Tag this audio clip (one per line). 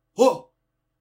shout
shouting